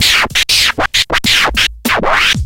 scratch344 looped
Scratching a noise sound. Makes a rhythmic funky groove (loopable via looppoints). Technics SL1210 MkII. Recorded with M-Audio MicroTrack2496.
chop, chopping, cut, cutting, dj, funky, hiphop, hook, loop, loopable, looped, noise, phrase, record, riff, scratch, turntablism, vinyl